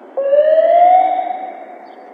20060308.police.siren
a single musical tone from a police car siren /un tono de sirena de coche de policía
environmental-sounds-research, streetnoise, field-recording, police, city